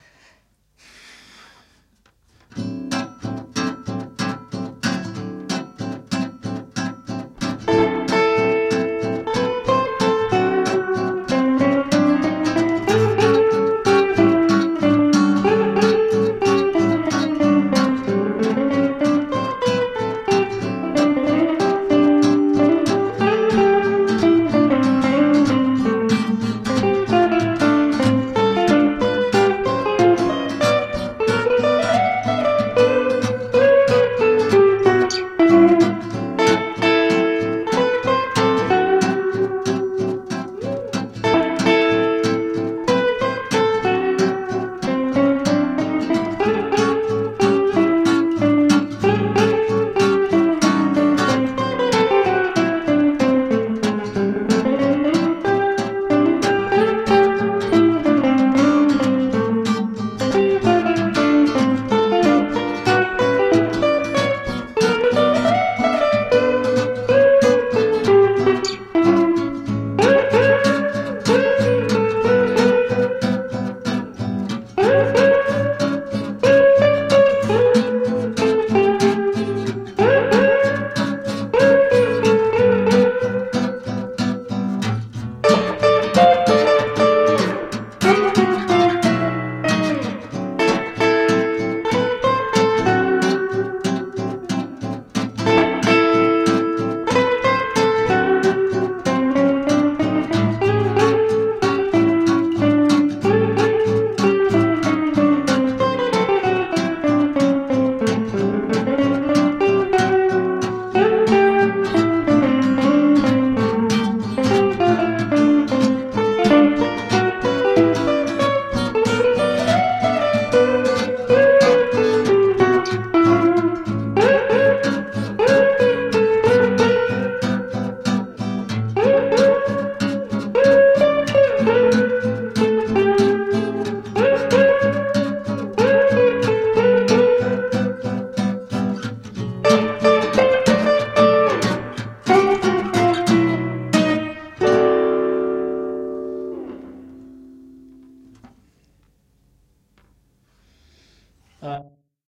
It`s simple swing melody, where i played on acoustic guitar and semi-holow electric guitar. Made like home performance, almost in live :)
tempo = 93bmp.
key\tonality = D.
Moderato_ ))
acoustic, archtop-guitar, electric-guitar, Experimental, guitar, home-performance, instrumental, jazz, music, performance, swing